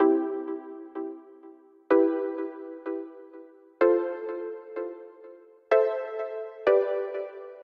Dream Hits
This is a plucky lead sound created using Sylenth1.
Music, Plucks, Electric, Electric-Dance-Music, Loop, Lead, Hits